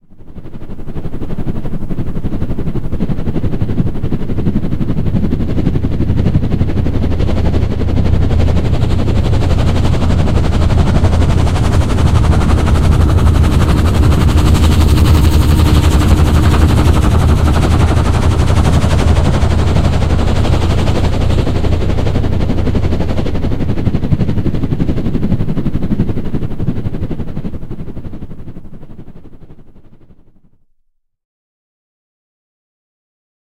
Helicopter Flyby / Pass
This is a 'helicopter' esque pass by created with my vocals, a tone generator and a guitar tremolo pedal. I think this could be best used as a layer. It really needs some background noise and potentially more engine noises to sound realistic.